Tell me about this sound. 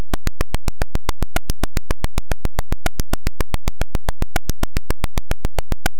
Funny Little Lines
I made this rhythmic waveform by first generating an sub bass square wave (3.67 Hz), and then applying wah style filtering and distortion.